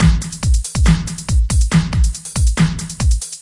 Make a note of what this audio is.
On Rd loop 6
140-bpm, 8-bar, dub-step, hip-hop, on-rd, on-road